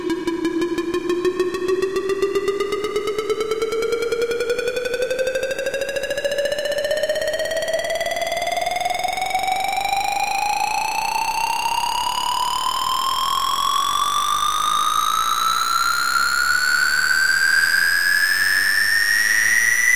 Edm Strontium Sweep with reverb
an upsweep for edm type music dubstep, trance etc etc
strontium, edm, sylenth1, reverb, create, effect, sweep, synth, FX, using